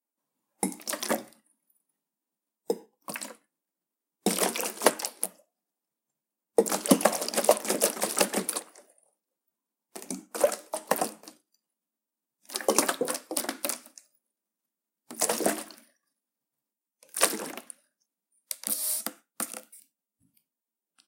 bottled water
water in bottle
recorded by xiaomi lite A2
edited in audacity
free, splash, water, bottle, splashing, plastic